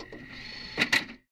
cd tray, closing